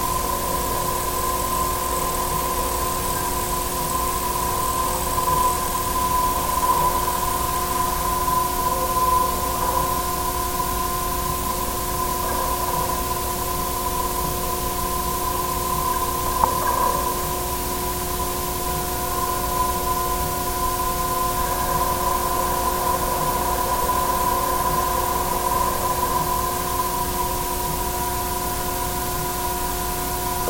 Denver Millennium Bridge 02
Contact mic recording of the Millennium Bridge in Denver, CO, USA, from the lower southeast stay second from the pylon. Recorded February 21, 2011 using a Sony PCM-D50 recorder with Schertler DYN-E-SET wired mic.
mic, PCM-D50, construction, contact-mic, Denver, construction-noise, field-recording, normalized, contact, Sony, Millennium-Bridge, contact-microphone, DYN-E-SET, wikiGong, Schertler, Colorado, bridge